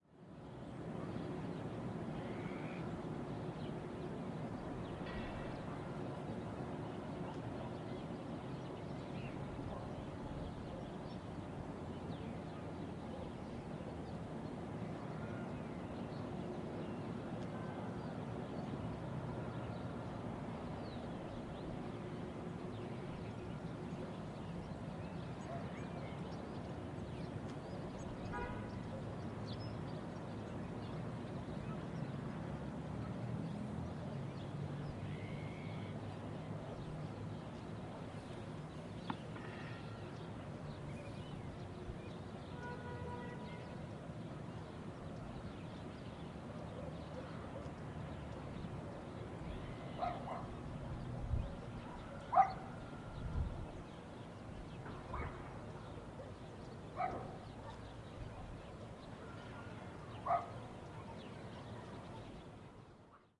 Alanis - From the Castle - Desde el castillo
Date: 23rd Feb 2013
In the village there's an old castle called 'Castillo de Alanís'. Its origin dates back XIII century and it was deeply damaged by the French army in 1808. It's not in very good condition nowadays, it needs to be restored but I guess there's no money for that. It host the 'Feria Medieval', a medieval encounter with traditional food, costumes and dances. You can read more about the castle here.
I got up to the top of the tower and recorded this take. The humming in the background is the omnipresent noise from the oil factory, one of the main economic activities of the place.
Gear: Zoom H4N, windscreen
Fecha: 23 de febrero de 2013
En el pueblo hay un viejo castillo llamado "Castillo de Alanís". Sus orígenes datan del S.XIII y fue profundamente dañado por el ejército francés en 1808. Hoy en día no está en muy buenas condiciones, necesita ser restaurado, pero me imagino que no hay dinero para eso.
Sevilla, Seville, fabrica, factory, field-recording, grabacion-de-campo, naturaleza, pain, pajaros, pueblo, soundscape, village